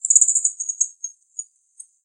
A piece of Nature. Individual bird chirps and phrases that were used in a installation called AmbiGen created by JCG Musics at 2015.
field-recording, nature, birdsong, bird, birds, forest